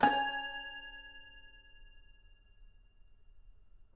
Toy records#02-C#3-01
Complete Toy Piano samples. File name gives info: Toy records#02(<-number for filing)-C3(<-place on notes)-01(<-velocity 1-3...sometimes 4).
studio, instruments, instrument, sample